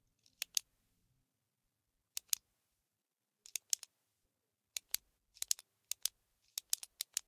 Pen Clicking 01
Pen clicking obsessively
5naudio17, clicking, disorder, obsessive-compulsive, pen